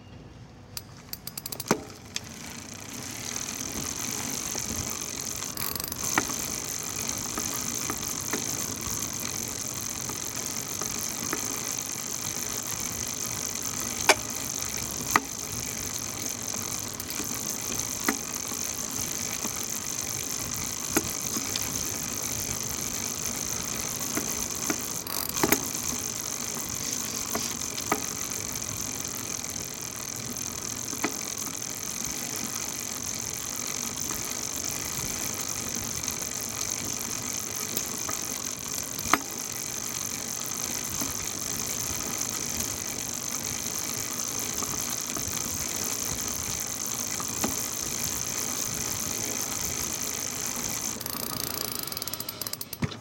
Bicycle Sound Take 1
Closeup sound of a bicycle chain.
Sanken Cos11
Wisycom MTP41 Transmitter
Wisycom MCR42 receiver
SoundDevices 633 Recorder
effect, gear, sound, pedals, Bicycle, noise, running